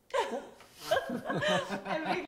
risada mulher woman laugh
mulher, risada, laugh, woman